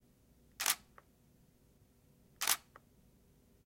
DSLR Sutter
Foley recording of a Canon DSLR, 80D camera.